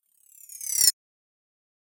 Perc Slide Dry
A dry version of a percussion based slide FX that sweeps through a pitch slide.
Bell, Bell-Slide, Cowbell, Cowbell-Roll, Dance, Drum, Drum-FX, Dry, EDM, Effect, Electro, FX, Hit, House, Perc, Perc-Slide, Percussion, Percussion-Effect, Percussion-FX, Phrase, Phrasing, Pitch, Pitch-Slide, Sample, Slide, Sweep